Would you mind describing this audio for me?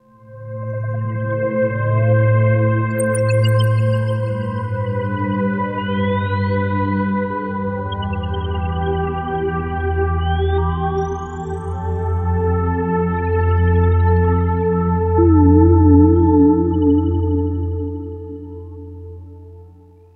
131-ancient-lover-ambient-loop
ambient loop.. 131bpm